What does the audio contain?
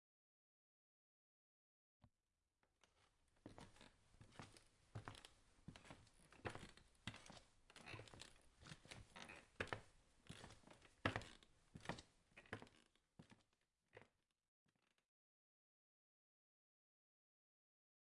Walk - Wooden floor
Walking on wooden floor
Panska, Czech